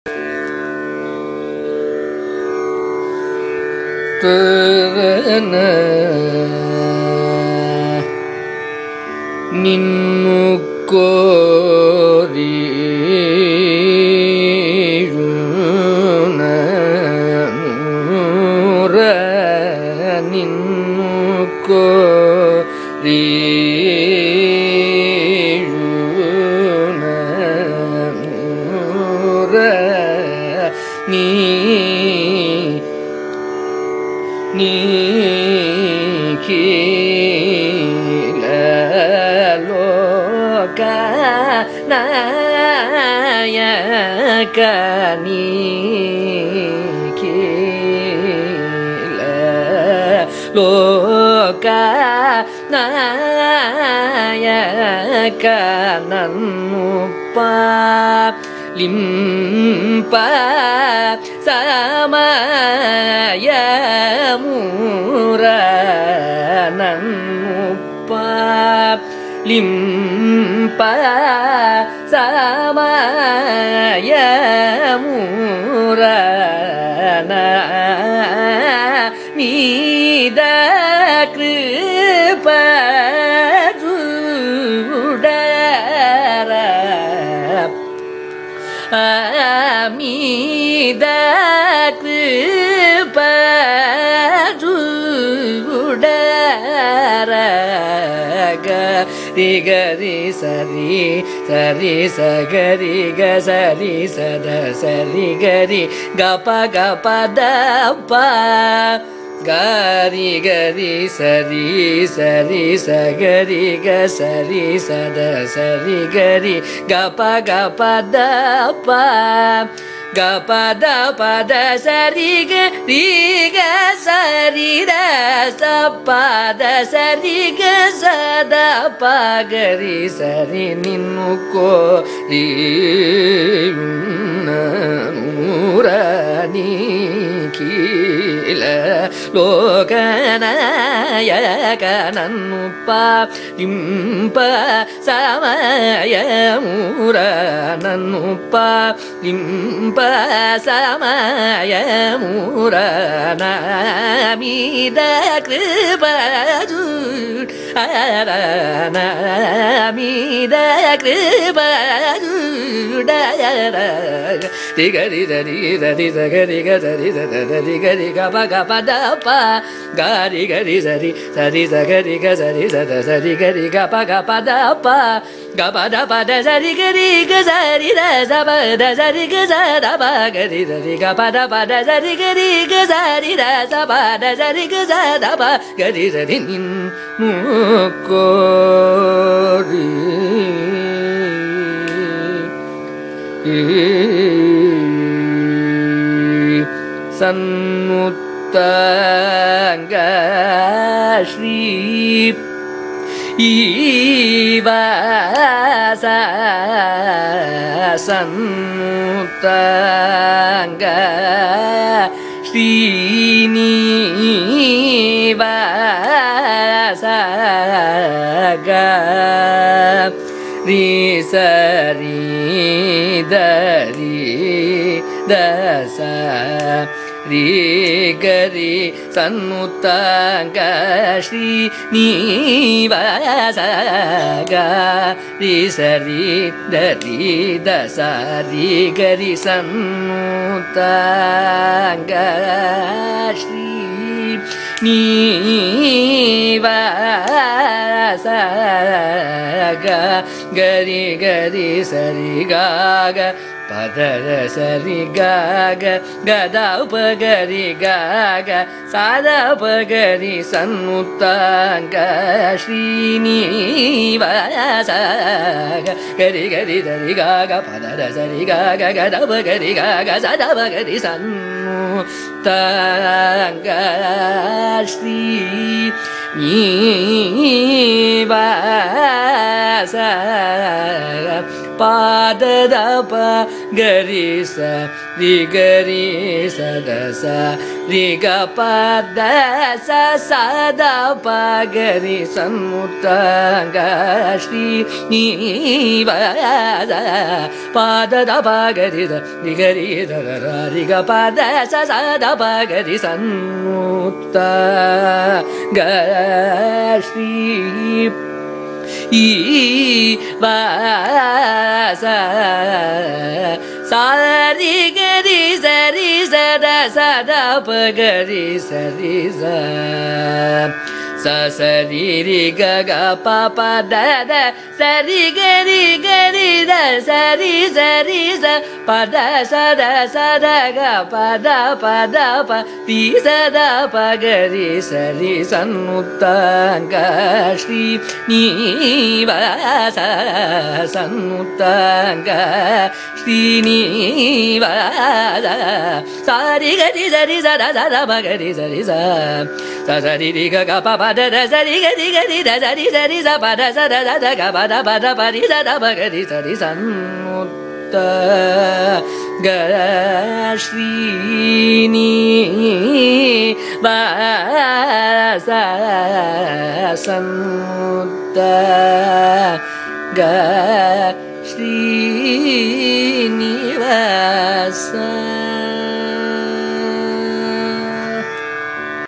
Carnatic varnam by Ramakrishnamurthy in Mohanam raaga
Varnam is a compositional form of Carnatic music, rich in melodic nuances. This is a recording of a varnam, titled Ninnu Koriyunnanura, composed by Ramnad Srinivasa Iyengar in Mohanam raaga, set to Adi taala. It is sung by Ramakrishnamurthy, a young Carnatic vocalist from Chennai, India.